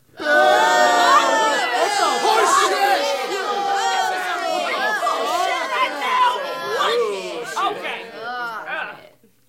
Small crowd booing with some curses added.